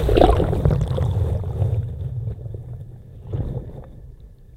Snippet of underwater sequence recorded with laptop and USB microphone in the Atlantic Ocean with a balloon over the microphone.